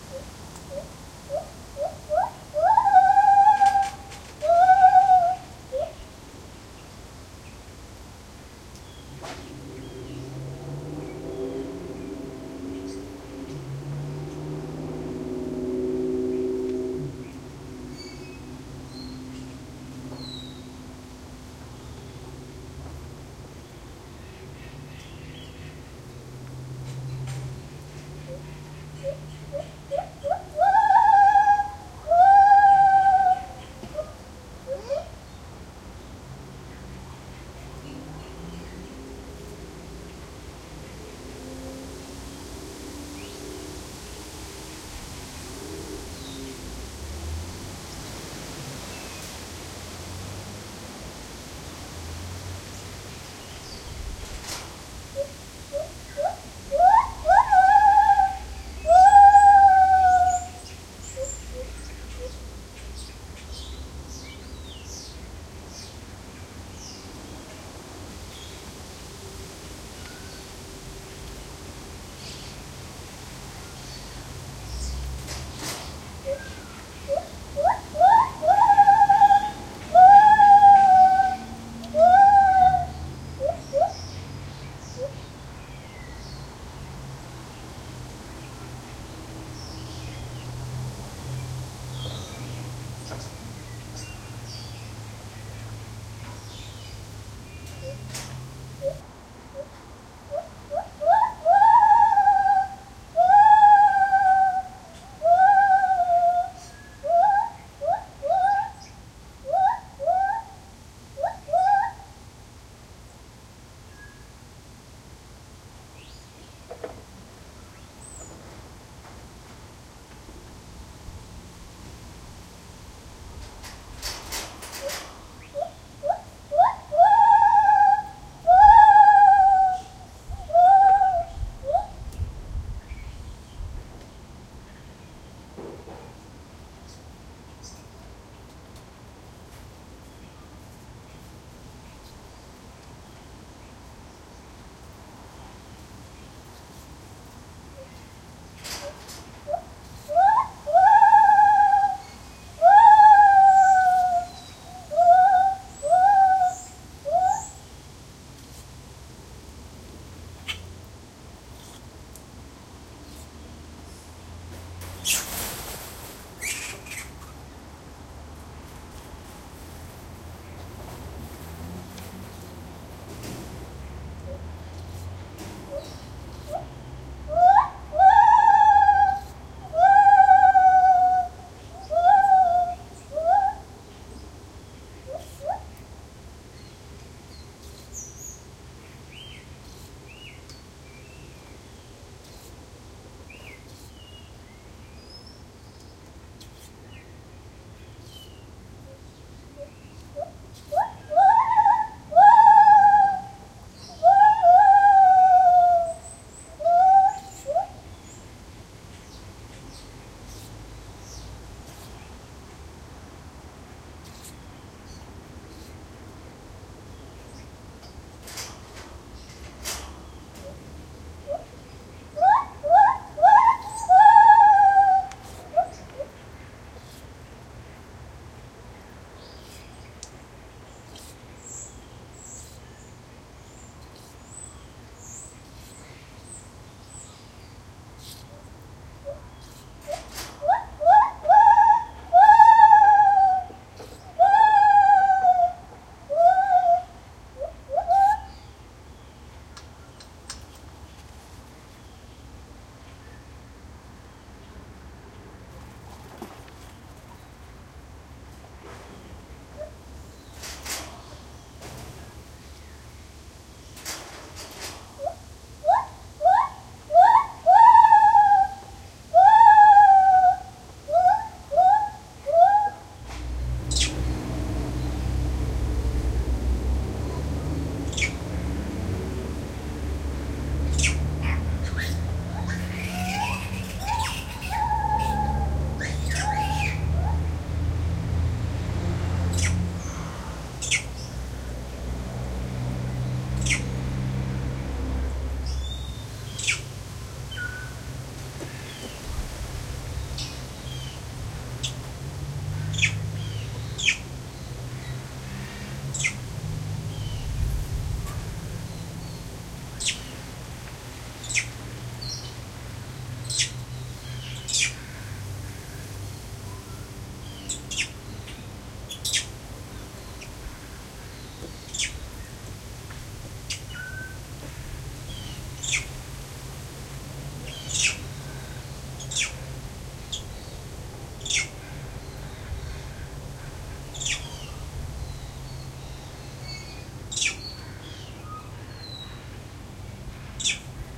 Recorded at Monkey Jungle. A single Lar Gibbon calling throughout the recording, with movement from Long-tailed Macaques and some calls. There are also some birds in the background and some traffic towards the end.